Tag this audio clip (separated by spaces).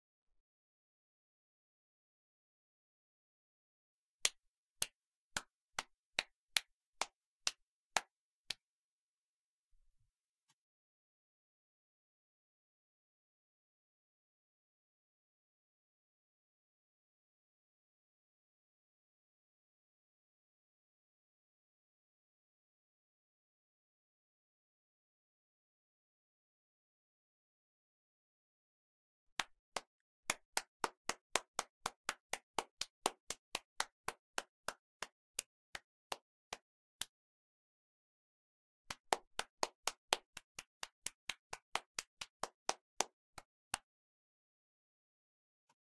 applause clap clapping hands indoors single